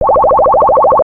MA SFX 8bit Bubbles 4
Sound from pack: "Mobile Arcade"
100% FREE!
200 HQ SFX, and loops.
Best used for match3, platformer, runners.
abstract, freaky, free-music, machine, sfx, future, effect, electronic, game-sfx, noise, sci-fi, loop, lo-fi, soundeffect, glitch, digital, sound-design, electric, fx